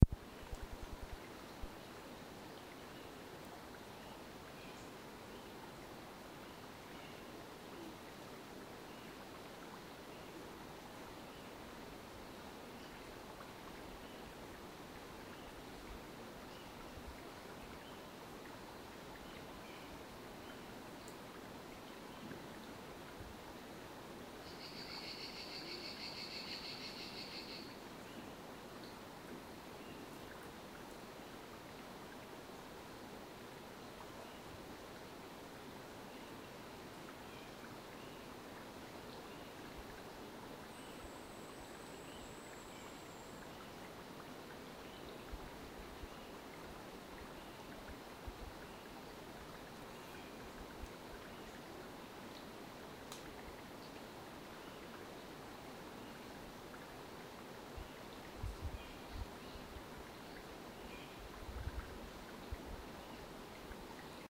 Recording from a forest. No process applied.